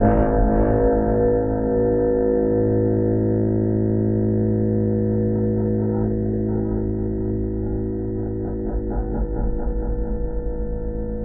creepy ambient 2
ambient, anxious, creepy, loop, nightmare, scary, sinister, spooky, suspense, terrifying, terror, thrill, weird